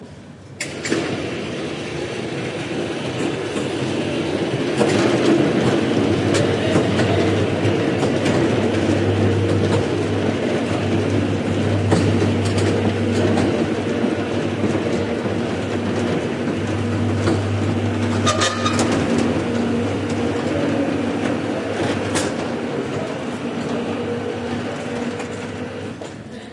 Automatic storeblind being rised. Recorded in Seville (Sierpes St) during the filming of the documentary 'El caracol y el laberinto' (The Snail and the labyrinth), directed by Wilson Osorio for Minimal Films. Shure WL183 capsules, Fel preamp, Olympus LS10 recorder.
blind, field-recording, shopping, store